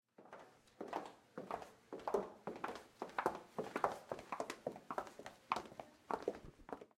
Heels 3 people
Group of 3 women walking in heels. Recorded with an H4n recorder in my dorm room.
high-heels, women